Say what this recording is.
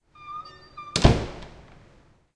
Closing a door
This sound was recorded at the Campus of Poblenou of the Pompeu Fabra University, in the area of Tallers in men bathroom, corridor A .It was recorded between 14:00-14:20 with a Zoom H2 recorder. The sound consist first into a high frequency and continuous sound produced by the squeak of the door, then a percussive with high attack sound is produced.
bathroom, door, percussive, bath